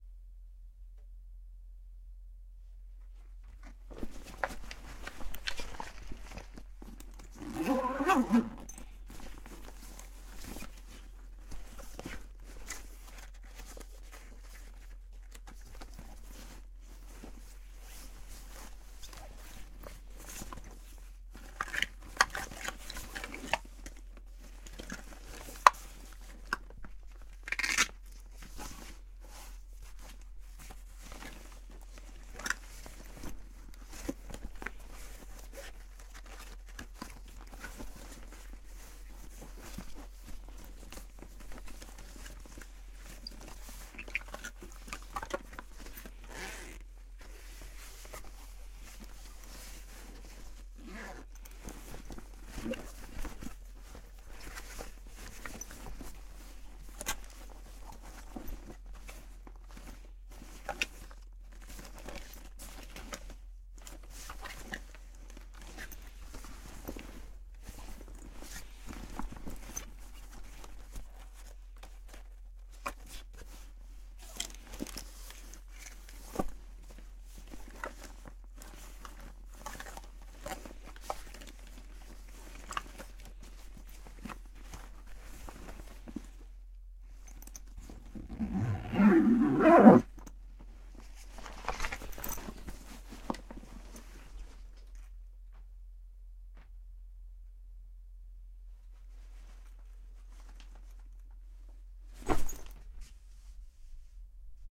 bag noise with zip
opening the zip of a bag, searching for something and closing the zip again ( a lot of things inside the bag: pill boxes, lip sticks, etc.) there is also the sound of a hook-and-loop fastener.
bag, dig, hook-and-loop-fastener, search, zip, zipper